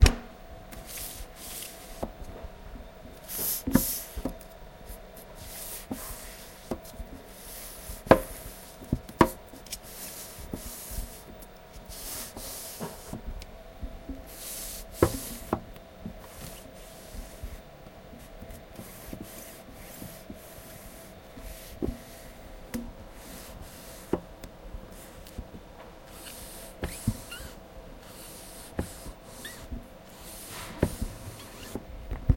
Someone searching one book in the library